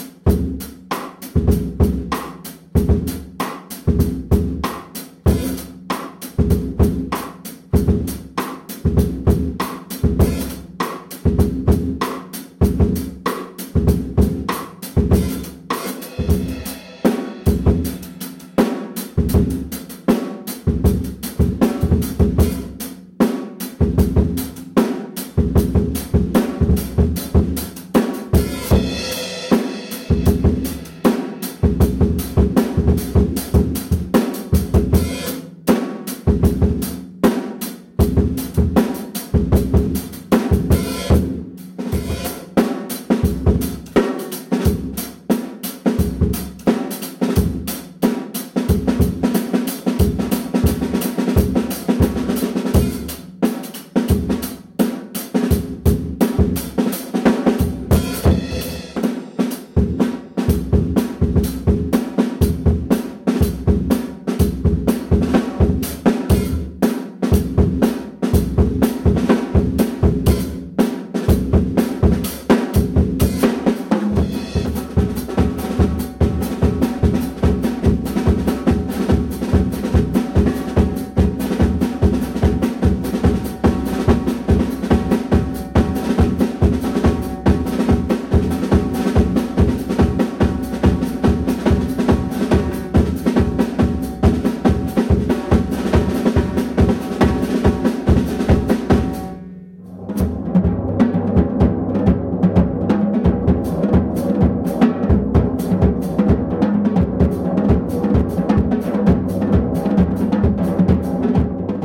This is a low quality recording from a Panasonic DVX camcorder microphone. This is a recording of random drum beats.
Because of the low quality recording, I have not separated any of the beats, and have faded one into the other.
The drum shells are Spaun, and the cymbals are a mixture of Zildjian and Paiste.
percussion, drummer, loop, percussion-loop